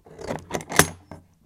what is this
Opening a large metal latch
buzz, latch, machine, mechanical, whir